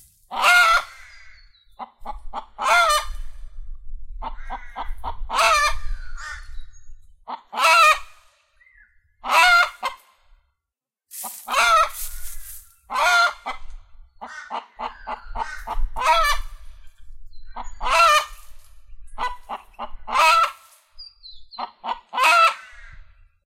chicken clucking out an alarm call. Recorded on zoom recorder with the permission of the chicken.
chook, cluck, rooster